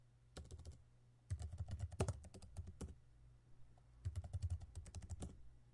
TYPING COMPUTER 1-2
Typing on keyboard